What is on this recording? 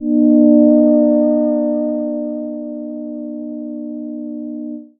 minimoog vibrating D-4
Short Minimoog slowly vibrating pad
synthetizer, short, synth, short-pad, moog, vibrato, pad, minimoog, slowly-vibrating, electronic